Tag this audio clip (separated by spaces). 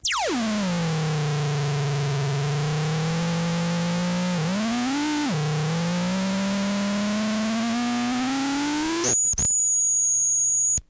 bent circuitbending electricity electronic glitch hum lofi melody noise power